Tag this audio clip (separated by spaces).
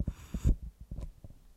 cloth fabric hiss metal object slide swish